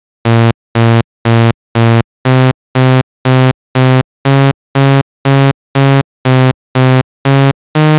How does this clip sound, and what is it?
Dance Bass
I hope this is usable.
FL Studio 12 -> Harmor -> Default.
Tempo: 120bpm.
bass, dance, electro, electronic, harmor, loop, synth